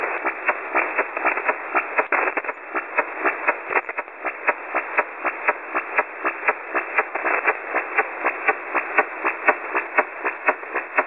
Shortwave Beep
Recorded on WebSDR through Audacity.
bleep, noise, shortwave, beep, shortwave-radio